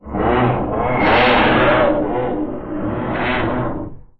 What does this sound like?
Vcr player ejecting a tape. Recorded onto HI-MD with an AT822 mic. Pitchshifted and processed
mechanism, vcr, pitchshift, eject, household